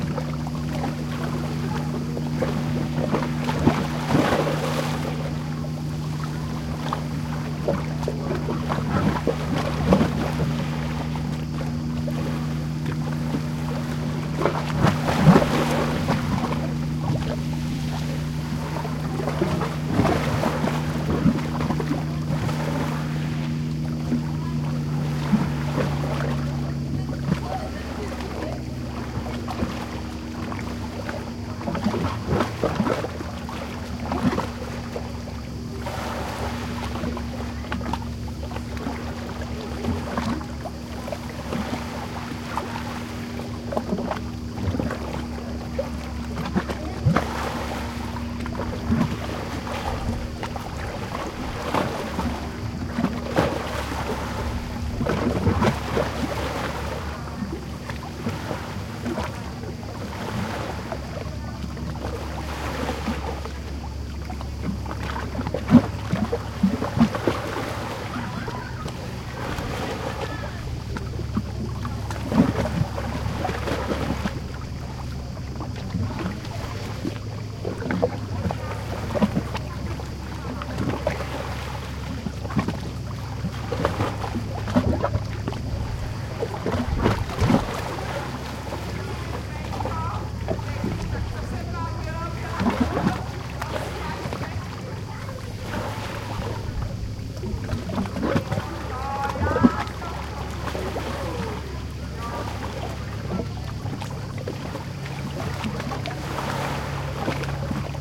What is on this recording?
120801 Brela AT Promenade 1 F 4824
The seaside promenade in Brela, in the morning, the surf is lapping gently against the rocks, tourists walk by talking (mostly some slavic languages) A motorboat can be heard out on the sea.
These recordings were done during my recent vacation in Brela, Croatia, with a Zoom H2 set at 90° diffusion.
They are also available as surround recordings (4ch) with the rear channels set to 120° diffusion. Just send me a message if you want them, they're just as free as the stereo ones.
nature, sea, mediterranian, crickets, morning, Brela, field-recording, maritime, water, atmo, Hrvatska, Croatia